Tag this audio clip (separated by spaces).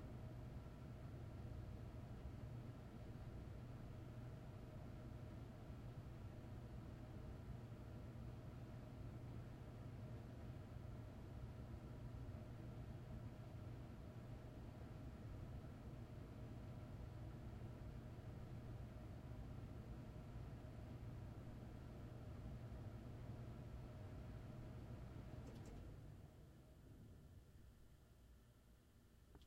tone room ambient